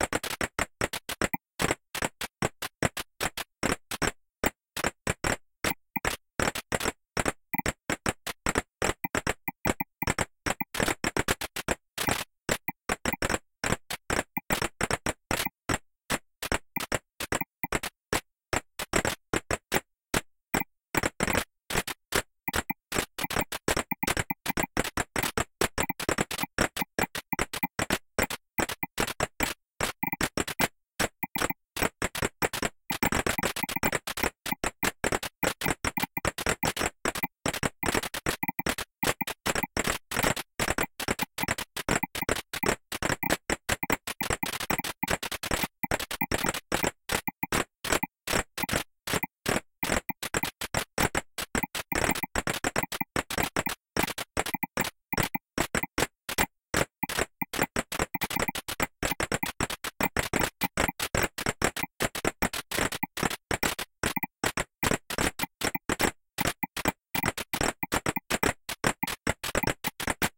computer science polyrythm-66
Polyrhythmic performance with filtered noise in puredata.
filter, noise, polyrhythm, puredata, sample